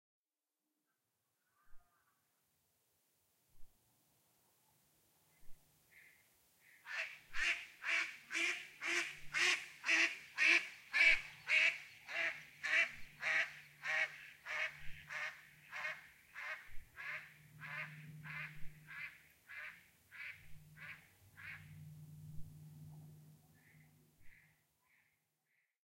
Mallard Duck quacking and flying away.